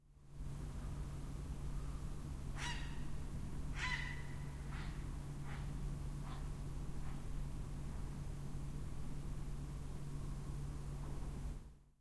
A heron screams and I'm asleep. I switched on my Edirol-R09 when I went to bed. The other sound is the usual urban noise at night or early in the morning and the continuously pumping waterpumps in the pumping station next to my house.
bed, bird, breath, field-recording, human, nature, street-noise